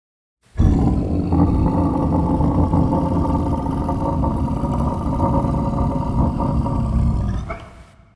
Monster sound
Guttural, snoar, creature, Monster